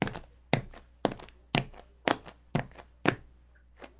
Pasos (steps)
Una serie corta de pasos sobre concreto. Grabados con una Zoom H6.
A short serie of steps on concrete. Recorded with a Zoom H6.
footstep, footsteps, pasos, step, steps, walk, walking